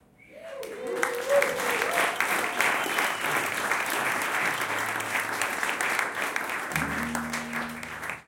small crowd applause 01
About 50 people clapping and applauding in a small venue.